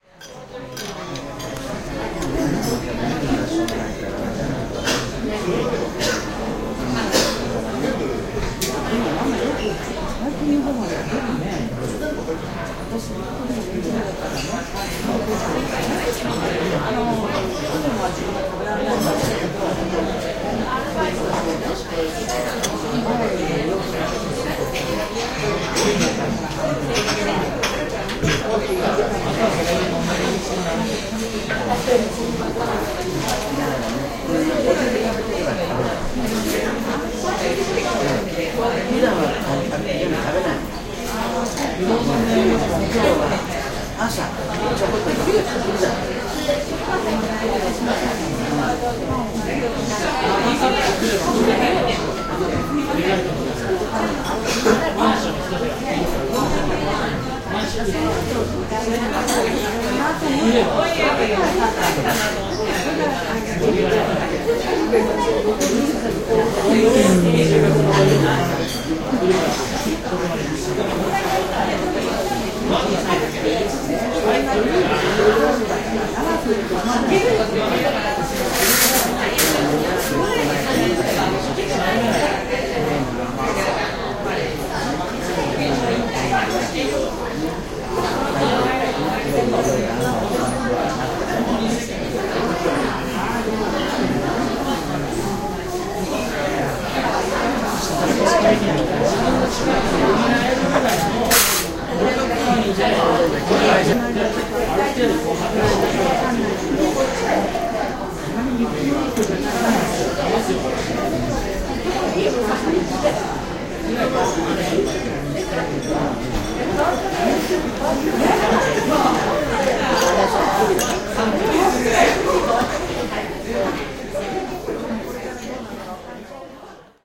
loud japanese diner full of drunk people 120-90surround
Crowded Diner/Restaurant in Tokyo. This is a stereo mixdown of a 4 channel surround recording I took in a crowded restaurant in Tokyo. You can hear a a lot of conversations, some waiters and lots of utensil sounds. Most of the conversation in the background is in Japanese. I think I heard some English when I edited this, but I'm not really sure. Anyways, good stuff. Mics were places at a 20 degree and 90 degree angle and then later mixed down to stereo. Enjoy!
japan, japanese-language, crowd, diner, ambience, restaurants, conversation, background, field-recording, dinner-conversation